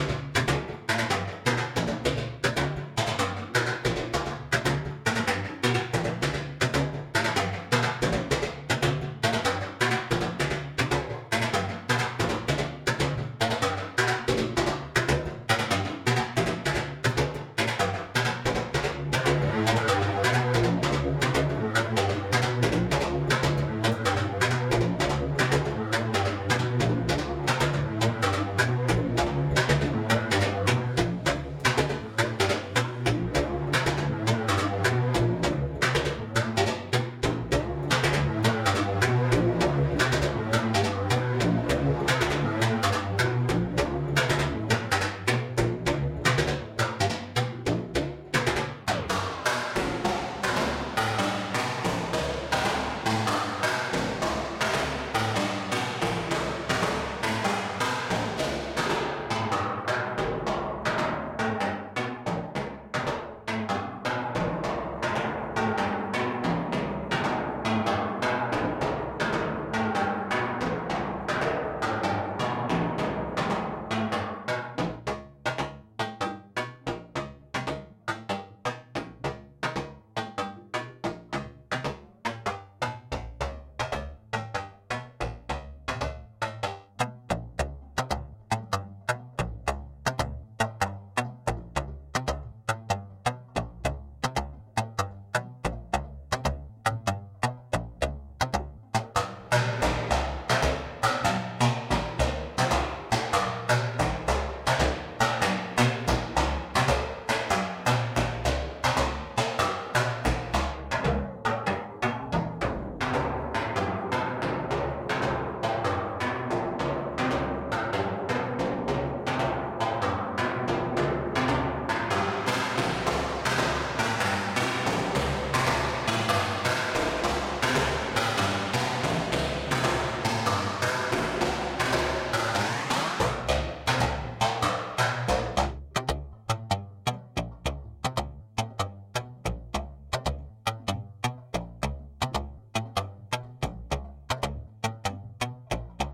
Rhythmic metalic beat2
Metallic Rhythm generated using DPO and QMMG sequenced 0-CTRL. Enjoy!
erbe-verb, improvised, makenoise, morphagene, percs, qmmg, rhythmic